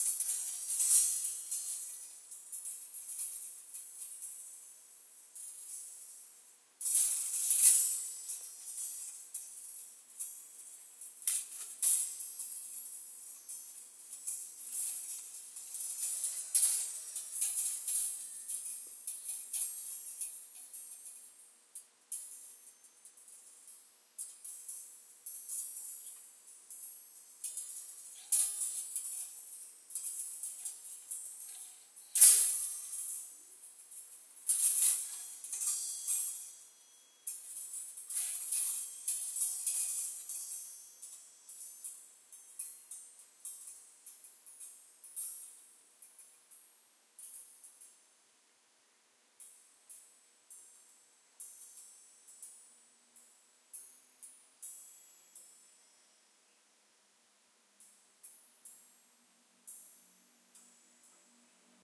metallic coathook
Note: It sounds far better after being downloaded because it has pretty nice overtones
This shit is meant to tickle your brain :> enjoy